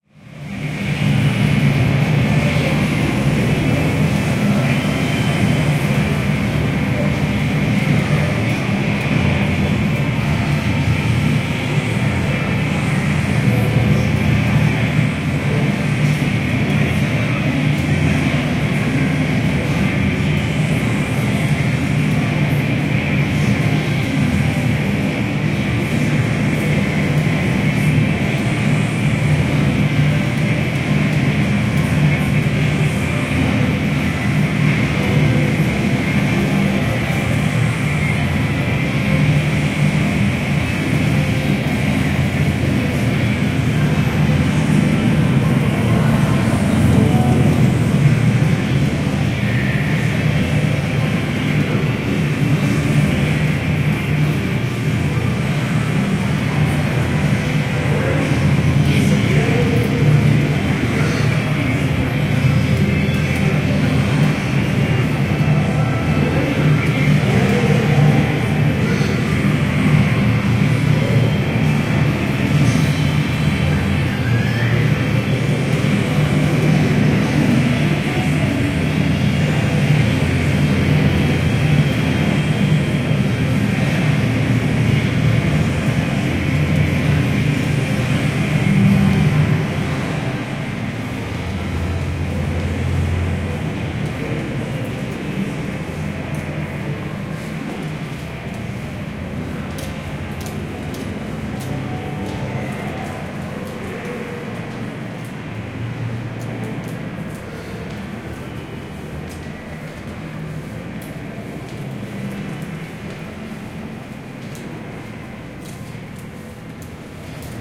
Japan Matsudo Pachinko Casino behind Closed Door
I recorded the sound of several pachinko parlors (vertical pinball machines, for recreation and gambling), in Matsudo, Chiba, east of Tokyo. Late October 2016. Most samples recorded from outdoors, so you can hear the chaotic cacophony of game sounds when the doors open.
Arcade, Cacophonic, Cacophony, Casino, Chaotic, Chiba, City, Closing, Computer, Doors, Gambling, Game, Japan, Japanese, Matsudo, Mechanical, Noise, Opening, Pachinko, Pinball, Sliding-Doors, Stereo, Urban, ZoomH2n